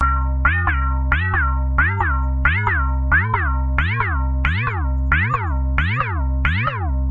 Bells n' Bass 135bpm
Processed Rhythmic Bells which increase in pitch